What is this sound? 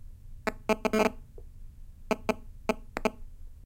MobilePhone MagneticInt PartIII
Third section of an Iphone 4 cycling while attached to a dock (some kind of unshielded Sony alarm/personal stereo). Recorded with Edirol R-05. Some distortion, not from the recording. Unprocessed. Hotel room ambience. Full version is posted as well.
interference, magnetic-interference, mobile